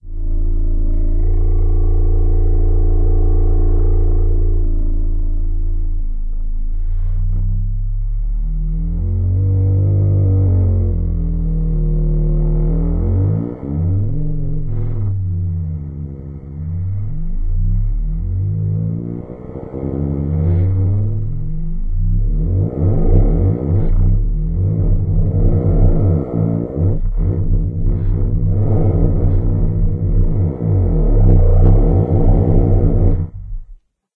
A long and low clarinet tone processed by Granulab. The character is changed dramatically resulting in a moving tone with dramatic and cinematic quality.